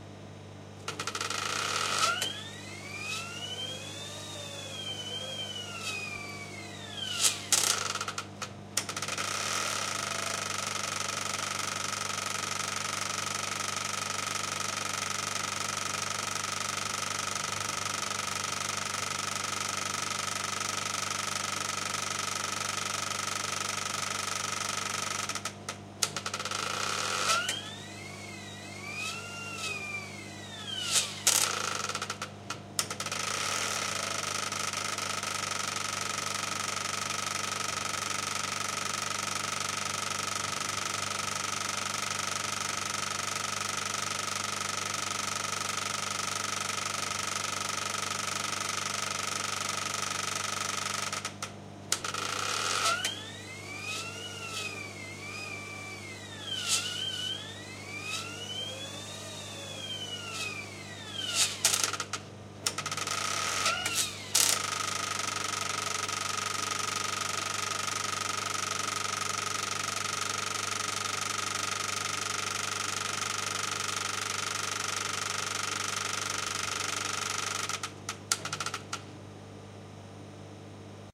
tools sondornova mixing 1
SONDOR NOVA, a high speed 35 mm. cinema projector, running in a film sound mixing session (Barcelona). Recorded with MD MZ-R30 & ECM-929LT microphone.
cinema-projector, projector